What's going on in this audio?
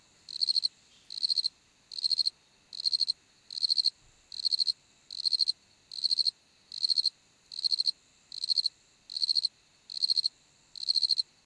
Cricket No 127
Another cricket ~ #127th I have recorded :) Recording chain: Panasonic WM61A home-made binaurals - Edirol R09HR.
field-recording, insect, cricket, night